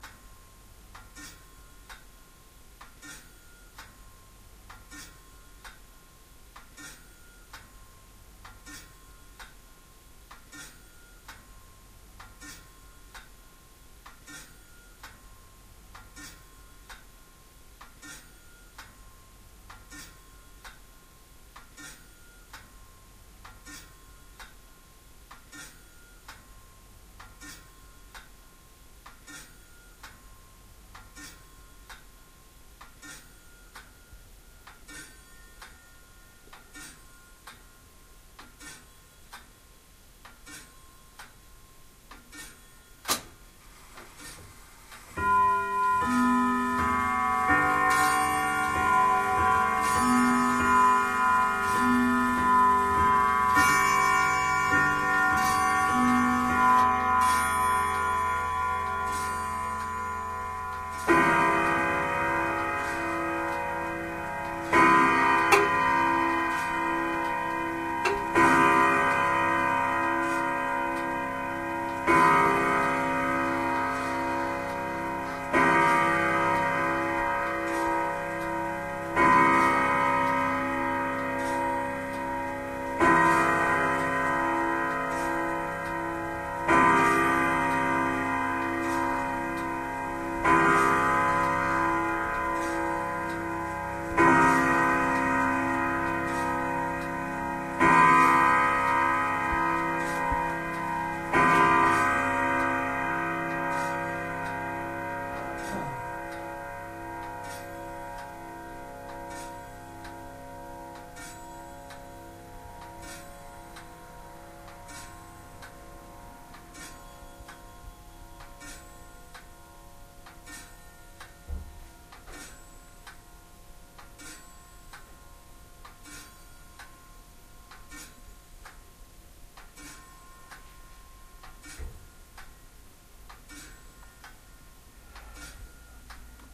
OLD GRANDFATHER CLOCK
This is an 18th Century grandfather clock striking twelve. You can hear the slightly shaky mechanism as it vibrates the chimes with every tick.
12-o-clock, chimes, clock, grandfather-clock, new-years-eve